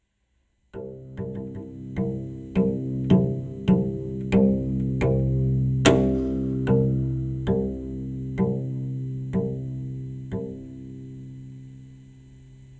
Cello Play C - 01
Recording of a Cello improvising with the note C
Acoustic, Cello, Instruments